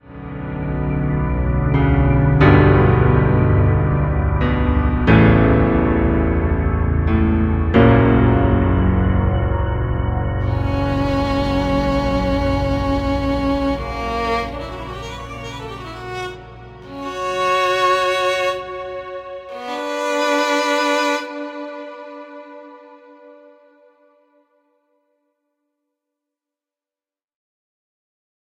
grabby bow sample
OK, I don't know how many of you might be interested in this, but I figure there's no harm in posting it.
I'm working on some original songs. Laptop-based, electronic songs, with many orchestral parts, including violin, viola, cello, and string bass. Presonus Studio One has some very nice VST string instruments, and I have some really great ones for Kontakt. But they all are missing one thing, and I couldn't find the (admittedly esoteric) sound that I'm looking for anywhere on the internet. Being a viola player myself, I recorded myself playing these very particular incidental sounds. Let me explain-
There's this 'grabby' sound that a well-rosined bow makes just is it is first being drawn across the string. Listen carefully to any of the pros and you'll hear it. In your laptop sequences, if used subtly, right at the point where the first note of a phrase is initiated, this sound can give the string part a marked sense of realism*.
viola, violin, bow, bow-sound, orchestral